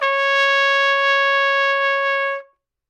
trumpet-csharp5
Part of the Good-sounds dataset of monophonic instrumental sounds.
trumpet, single-note, sample